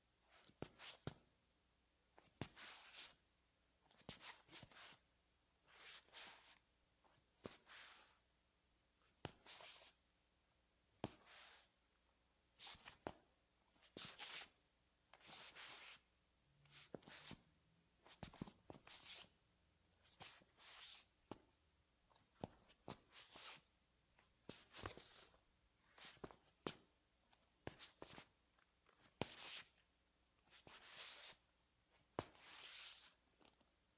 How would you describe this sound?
Footsteps, Tile, Male Tennis Shoes, Scuffs
Tennis shoes on tile, scuffcs
scuffs, footsteps, tennis, male